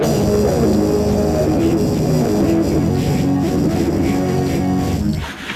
Mouth Voice Synth Techno Music Loop 200616 0008 01
Mouth Voice Synth Techno Music Loop
I transformed the sound of my voice and added effects, then mastered it.
Recorded Tascam DR-05X
Edited: Adobe + FXs + Mastered
Dance, rythm, House, Bass, Synth, EDM, Techno, Loop, electronic, Music